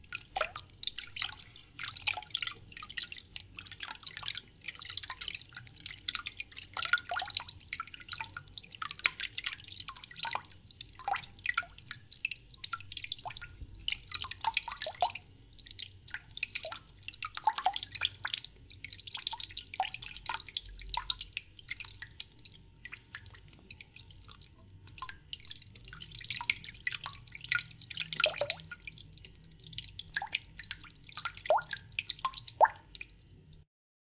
running water drops-01
hand-made running water drops on water of a sink
sounds flowing, quite calm
recorded with sony MD recorder and stereo microphone
drops, hand-made, running, water